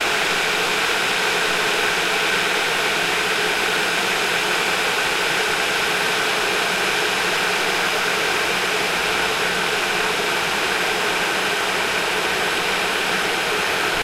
My electric boiler going at full strength heating the water
boil, boiler, cooker, electric